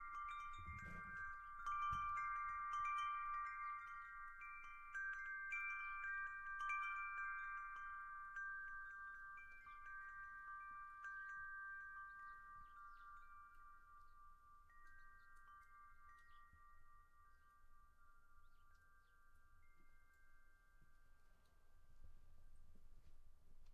a recording of the several wind chimes in my backyard
bell, bells, chime, chimes, jingle, ring, ringing, wind-chimes